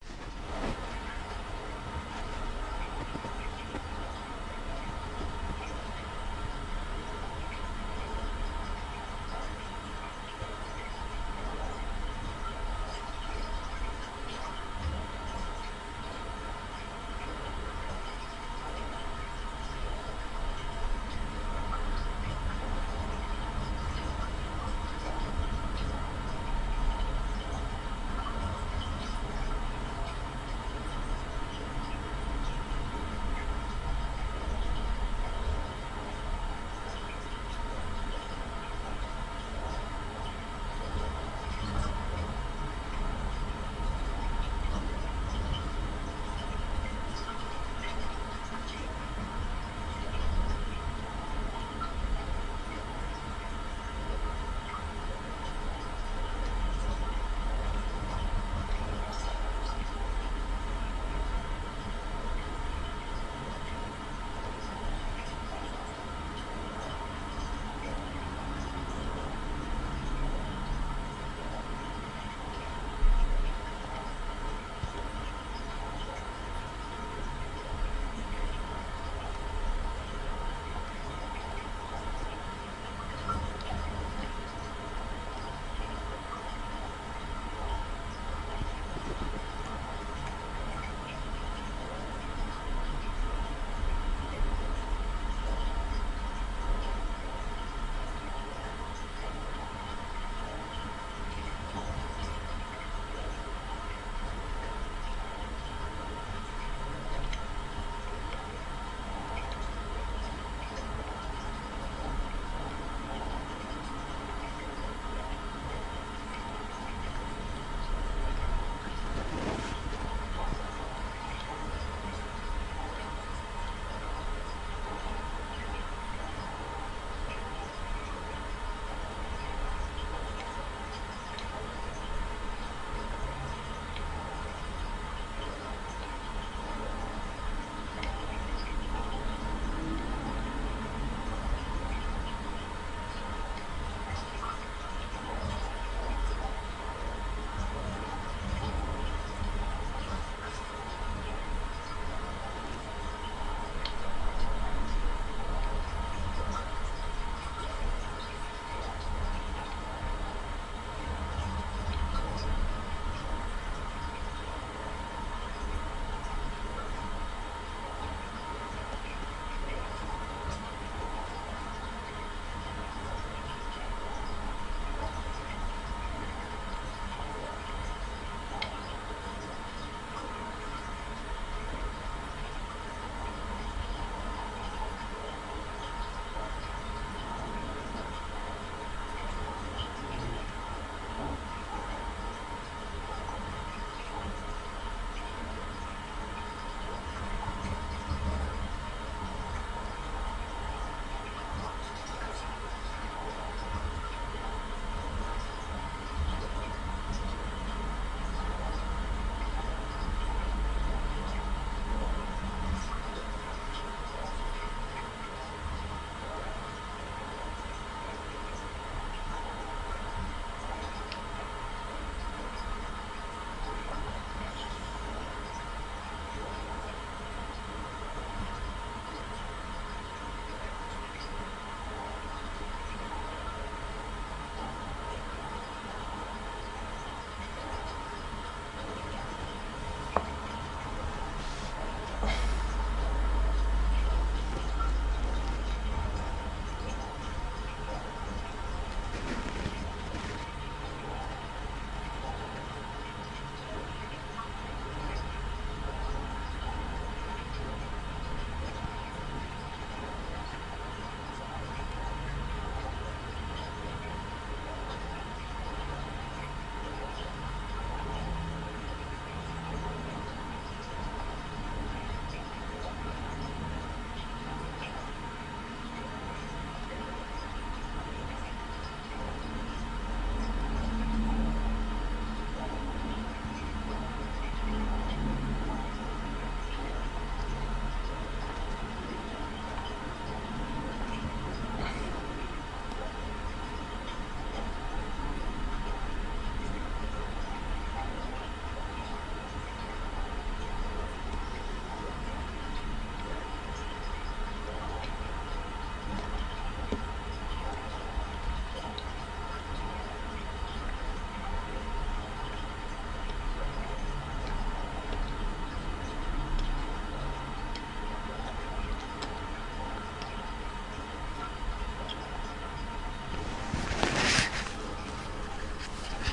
Environnement Ambiance 001
001, Ambiance, Environnement